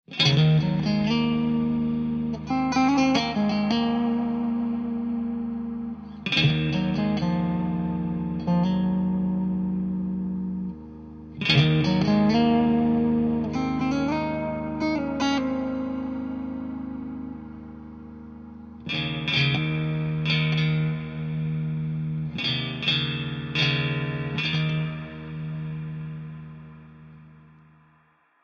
Clean Guitar #28 - Celtic Vibe
Acoustic, Acoustic-Guitar, Background, Calm, Celtic, Chill, Chords, Cinematic, Clean, Electric, Electric-Guitar, Fantasy, Film, Game, Guitar, Instrumental, Medieval, Melancholic, Melody, Minimal, Mood, Movie, Music, Slow, Solo, Solo-Guitar, Soundtrack, Vibe